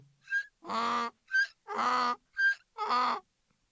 Human impersonation of a donkey. Captured with Microfone Condensador AKG C414.